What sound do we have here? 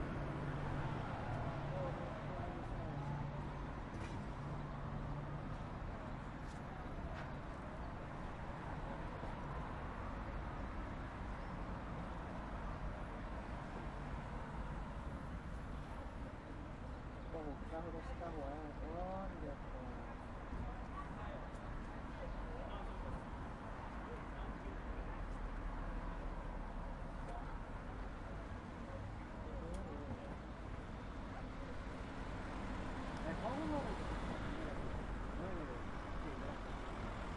Ambience EXT day street beleme lisbon portugal
Ambience, beleme, day, EXT, lisbon, portugal, street